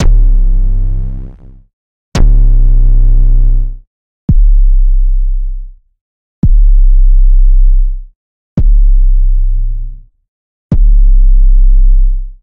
Square Kick in C [Stereo Detune]
I had used FL Studio 11's 3xOsc to make these. In the piano roll I used the note slider and note properties (like Cutoff, velocity, and Resonance) to modify each body of the kicks. They're all in C so there shouldn't be any problems in throwing it into a sampler and using it. BE SURE to msg me in any song you use these in. :D